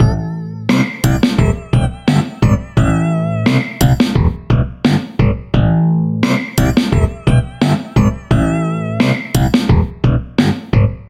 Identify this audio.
Hip-Hop Loop #2
A hip-hop/rap loop made in FL Studio.
2021.
beat, drums, funky